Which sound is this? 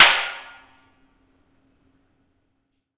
This is a concrete hallway/cellar in the building that i live in =)
It's a loud one!